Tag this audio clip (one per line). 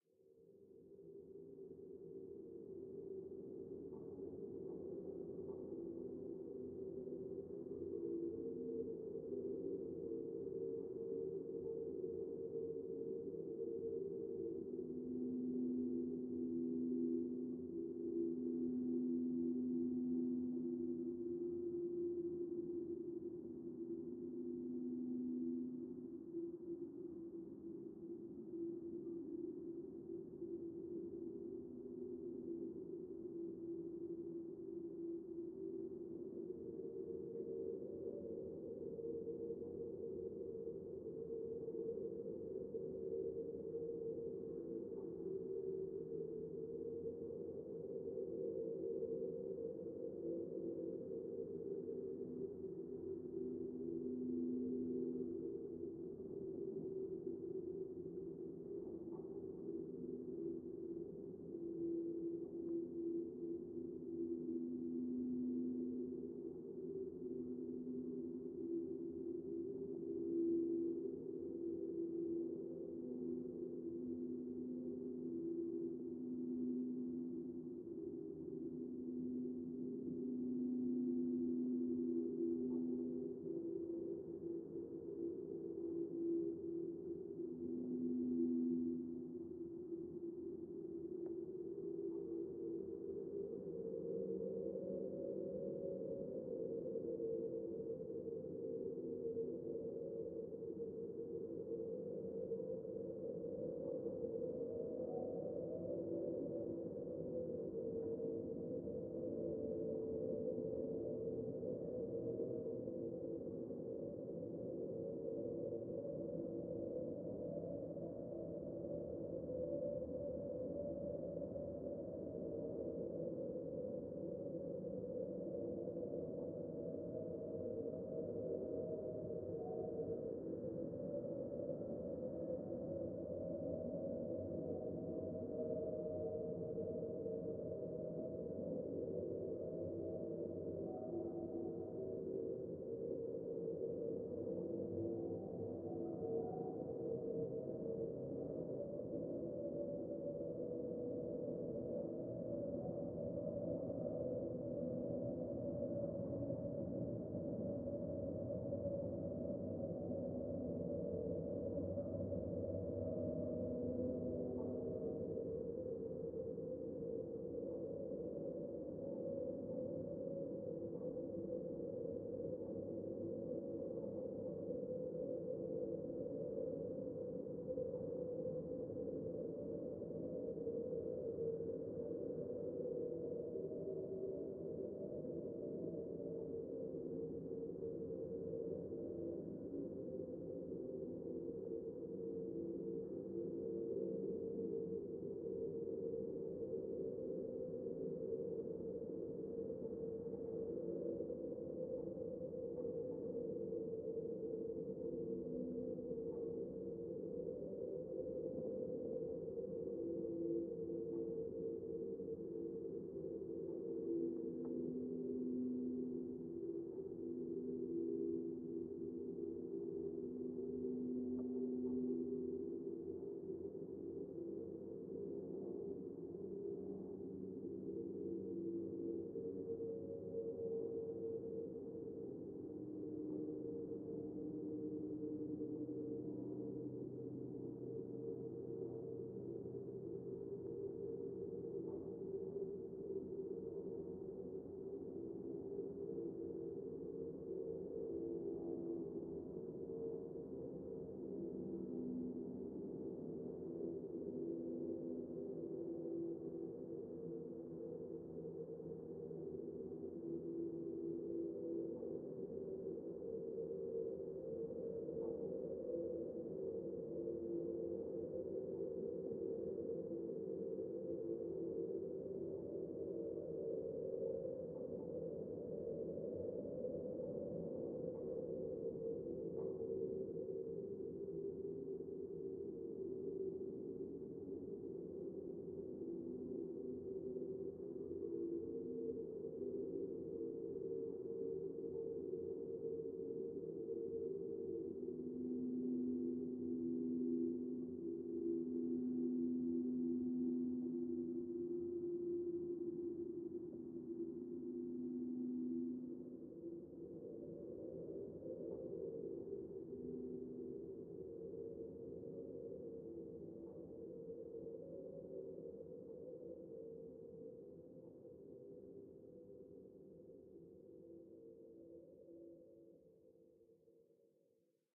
AMBIENT
CONTACT
DRONE
SOUNDSCAPE
WIND